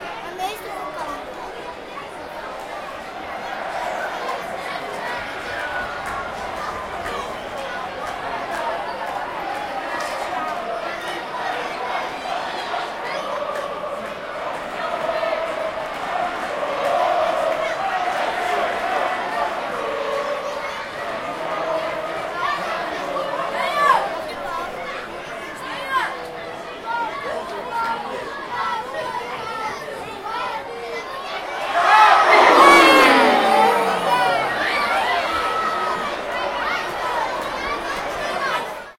Field-recording of a Dutch soccermatch.
Recorded in the Cambuur Stadium in Leeuwarden Netherlands.